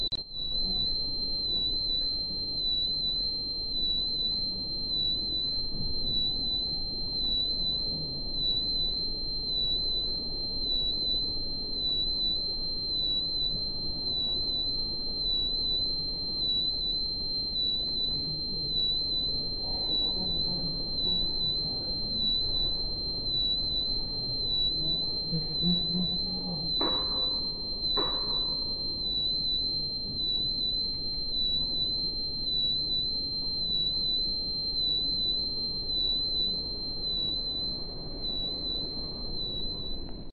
Even lower pitch mosquito sound
This is a version of the sound that (if you're over 25) you CAN hear. It's called Mosquito. They've been using it as a silent deterrent in the UK to clear streets of teenagers... Hmmmm. Makes you wonder. Not a great sound sample, but horrible to listen to and a reminder to us all of the power of sound. And the invisibility of it. kj
teenager, mosquito